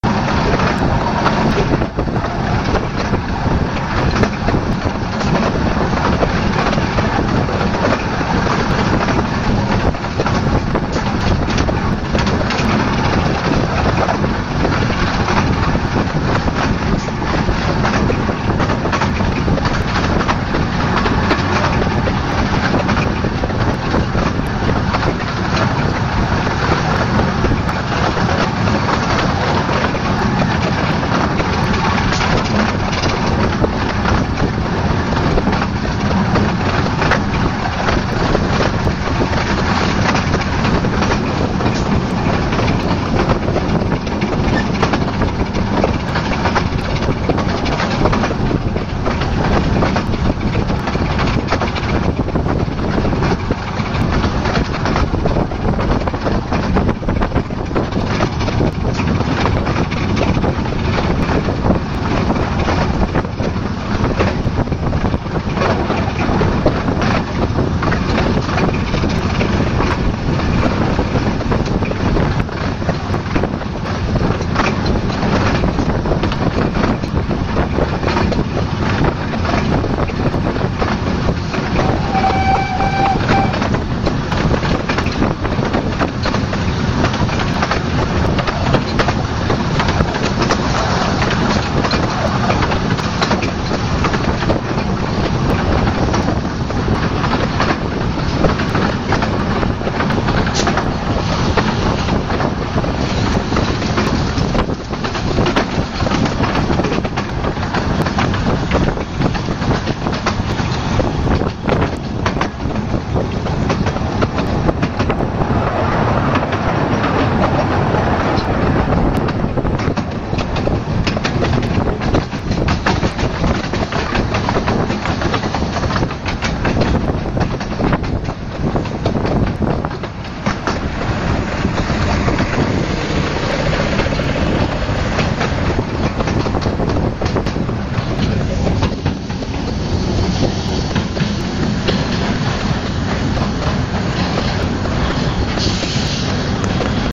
The Steamer Train "La'al Ratty", Lakes District, UK
The sound of "La'al Ratty", a small gauge train between Dalegarth and Ravenglass, Lakes District, UK. Recorded on 2009.09.21 between 11:40-12:20.
lakes-district, railways, steamer, train, uk